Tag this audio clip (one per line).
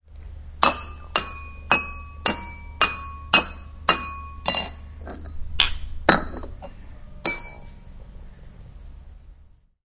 belgium
cityrings
toverberg